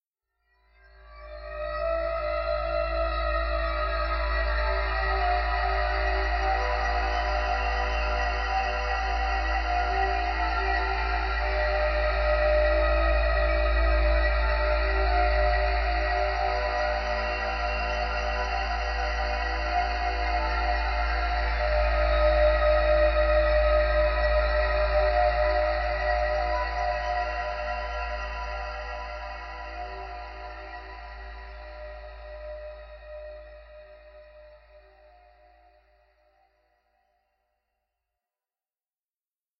Perhaps useful as a harmonic layer on a bassy pad? Additive synthesis, reverb and unison.
pad, ambient, drone, overtones